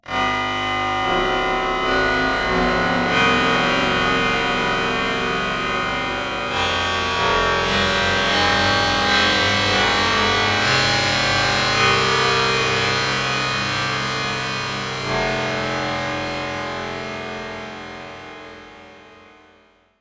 Processed Prepared Piano 2
Created in u-he's software synthesizer Zebra, recorded live to disk in Logic, processed in BIAS Peak.
abstract, metallic, prepared-piano, processed, synthesized